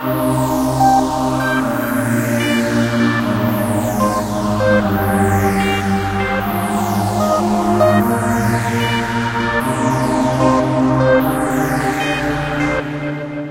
Riser 4 Flicker
Pads and strings with a softsynth and delay. 150 bpm
flange, progression, strings, techno, beat, phase, melody, sequence, trance, pad, 150-bpm, synth